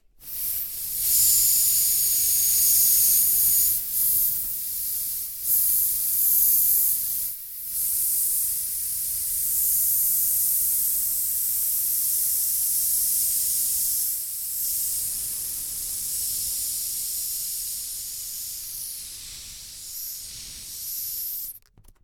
Recorded as part of a collection of sounds created by manipulating a balloon.